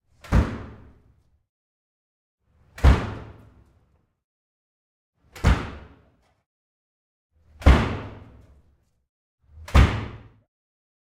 door wood front apartment ext enclosed courtyard slam nearby echo various
front, apartment, wood, courtyard, slam, door, ext